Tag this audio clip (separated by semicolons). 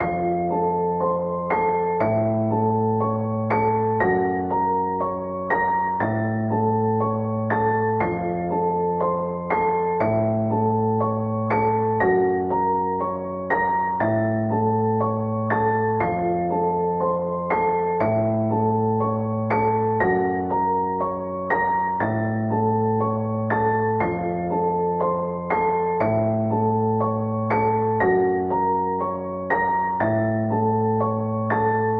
bpm; bass; 60; 60bpm; dark; piano; loop; loops